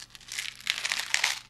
pills in a jar 01 shuffle 03
Jar of pills shaken.
pills, bottle, one-shot